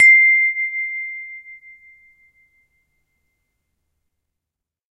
windchime tube sound